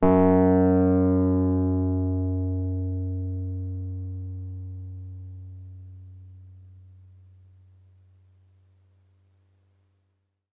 My Wurlitzer 200a Sampled thru a Lundal Transformer and a real Tube Preamp. The Piano is in good condition and not bad tuned (You still can retune 3 or 4 Samples a little bit).I Sampled the Piano so that use it live on my Korg Microsampler (so I also made a "msmpl_bank")
200a, electric, e-piano, wurlitzer